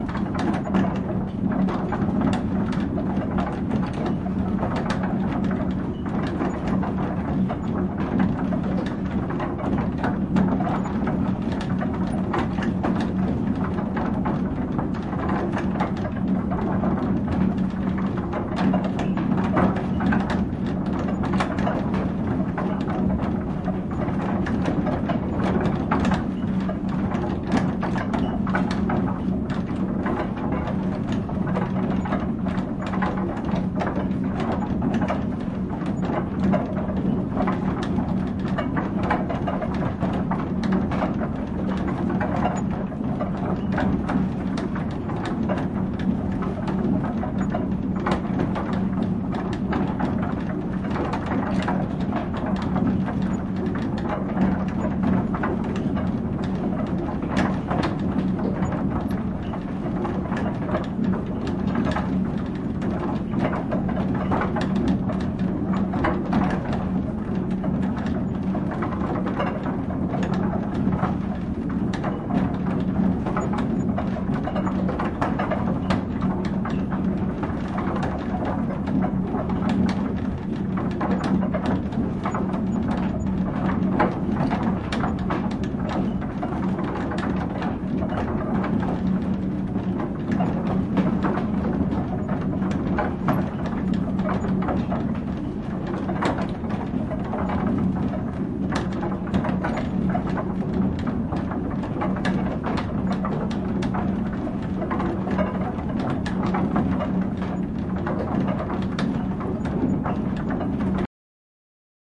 Water mill - gears
These sounds come from a water mill in Golspie, Scotland. It's been built in 1863 and is still in use!
Here you can hear the gears in the top floor of the mill.
water-mill, historic, mechanical, machinery